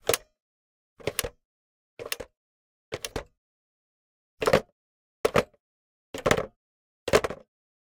Foley Phone Old PickUp HangUp Mono
Pick Up (x4) and Hang Up (x4) of an old phone.
Gear : Rode NTG4+